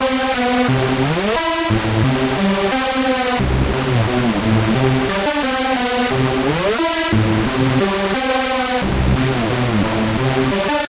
Dark reese made on a reFX Vanguard, its too simple, only detuned saw waves.
reese, synth